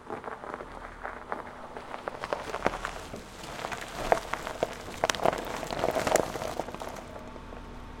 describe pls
BC car on gravel
Car pulling up on gravel, extremely close perspective